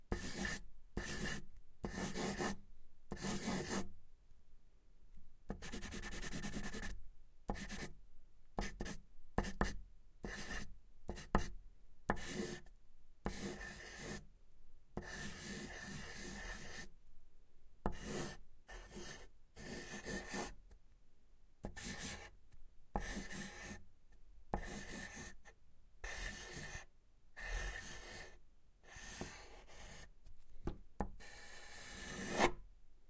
Pencil on Paper on Wood Lines and Scribbles
Recorded on an SD 702 with an SM81 and a cheap akg SDC can't remember which one just wanted variety. Not intended as a stereo recording just 2 mic options.
No EQ not low end roll off so it has a rich low end that you can tame to taste.
line, writing, pencil, write, paper, draw, scribble